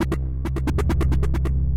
135 Grobler Synth 03
hard club synth
club, fast, free, hard, loop, sound, synth, trance